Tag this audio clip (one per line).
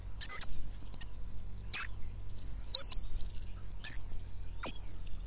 moan
feedback
cry
whine
animal
bird
dog
processed